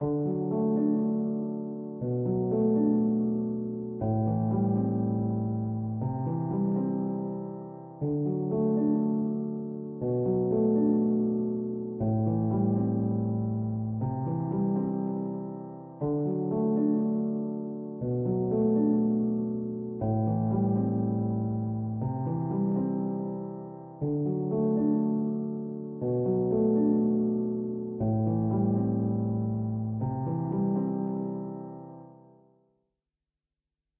Piano loops 040 octave down short loop 120 bpm
120, 120bpm, loop, music, Piano, reverb, samples, simple, simplesamples